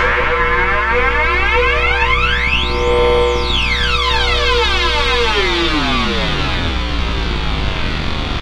Sounds from an analog sound device called 'The Benjolin' a DIY project by Rob Hordijk and Joker Nies. Sometimes recorded in addition with effects coming from a Korg Kaoss Pad.
benjolin, hardware, electronic, noise, analog, circuit, synth, sound